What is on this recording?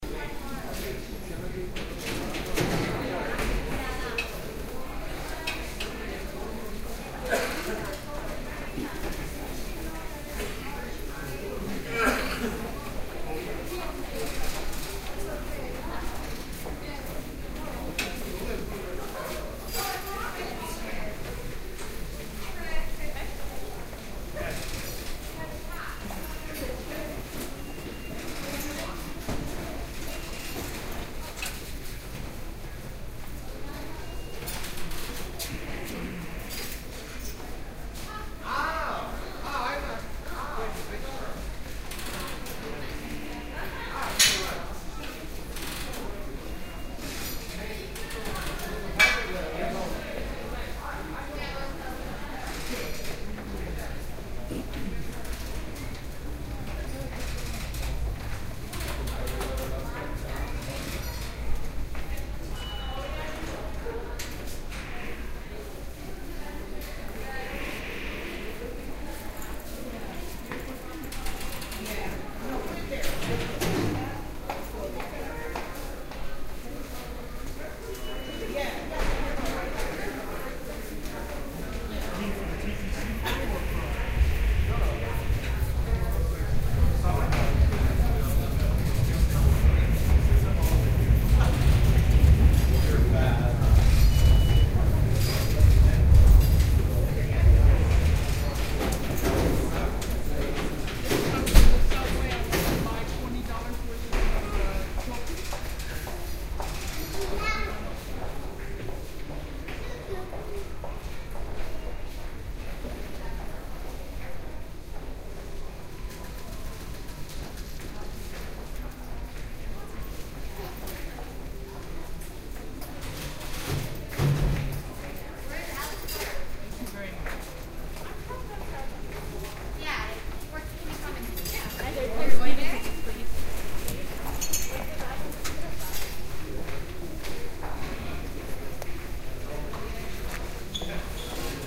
Binaural stereo recording of standing in line at the subway station. Small crowd noise.
chatting, crowd, field-recording, small